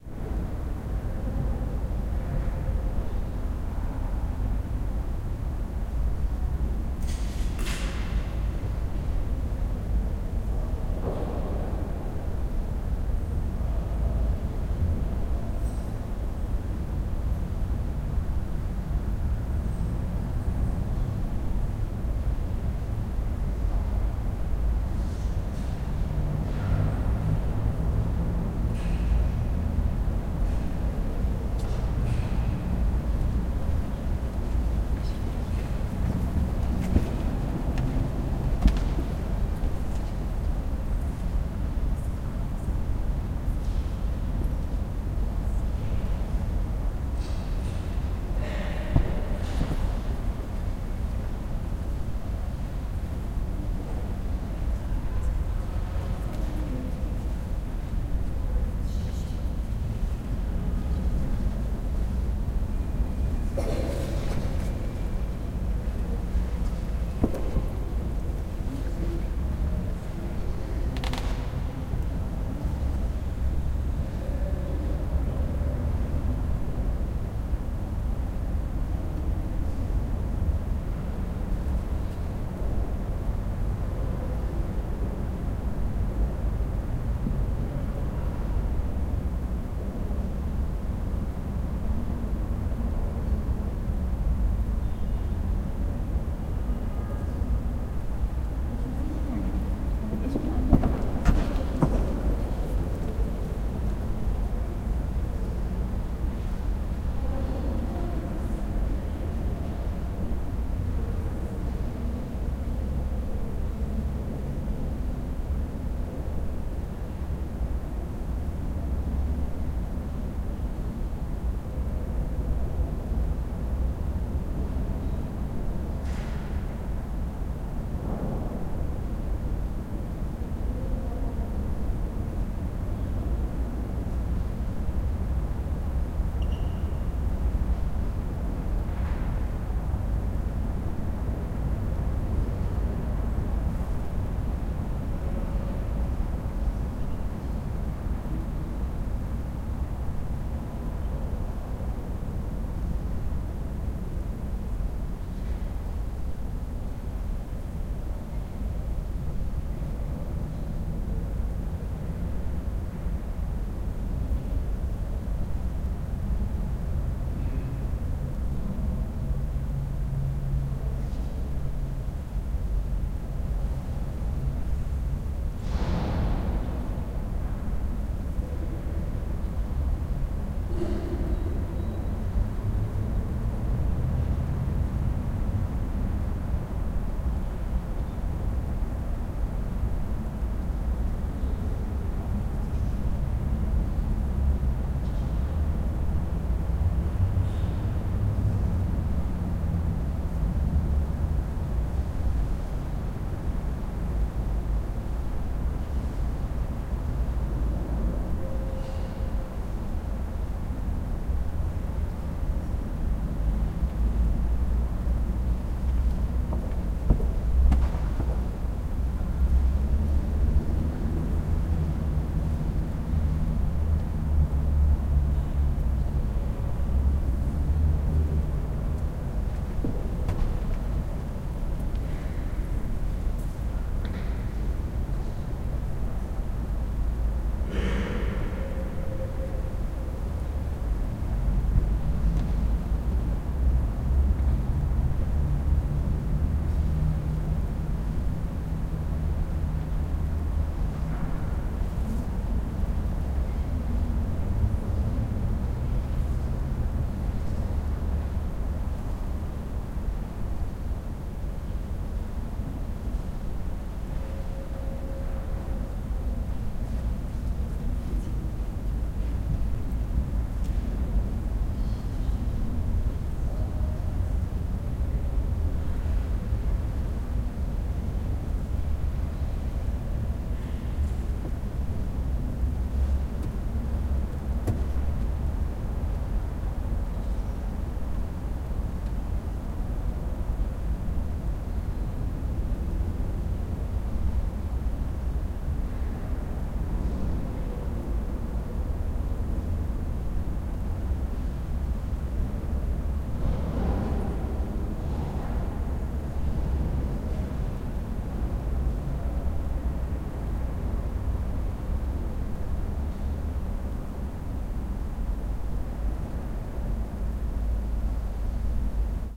romania church room tone
Room tone inside the Church of Saint Michael (Biserica Sfantul Mihail) in the central square in Cluj, Romania. Traffic, some chatter, and lots of room tone. Field Recording
recorded by Vincent Olivieri on 24 September 2014 using a Zoom H4N. Light editing done in Logic.
ambience, field-recording, room-tone